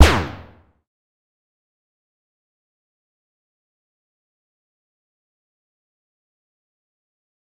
Sci Fi Gun Fires 1
Unlike my second sci-fi gun firing, this one requires no recharge time. This one shoots immediately. You may be interested to know that I actually created this using a free timpani sample I'd found. I'd spread it across multiple keys and pitches and was working on just a quick rise-and-rumble when I discovered that multiple played together created not a huge, loud drum beat, but this. Interesting, eh?
fi, laser, sci